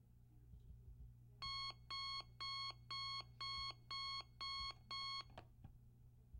alarm clock you wake up to.